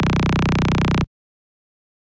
Bass note short
The sound are being made with VST Morphine,Synplant,Massive and toxic biohazzard.
club, dance, Glubgroove, house, samples, techno, trance